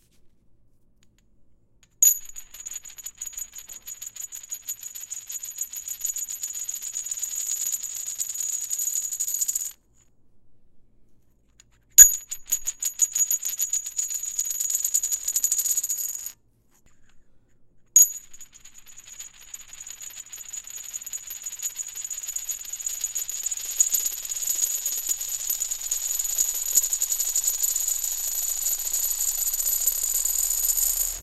Spinning 3 inch diameter x 1/8 inch thick aluminum disc on a granite plate. Recorded in stereo with an Edirol R44 recorder and Rode NT4 microphone.